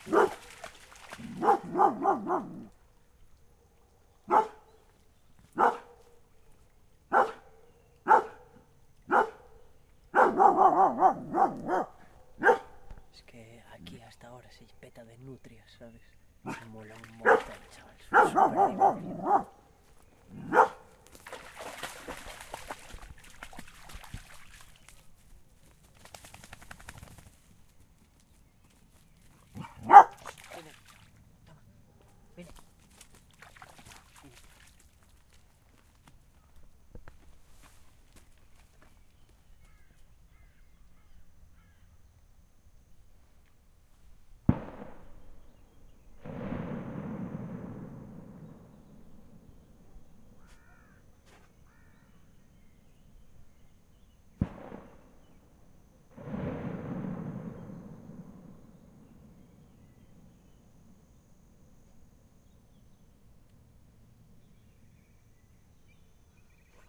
amb - cecebre 12 chu
dog, trees